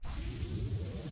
Space Ship Door Open
Sound effect I made combining a deotorant can and canola oil can spraying with a pitch shift.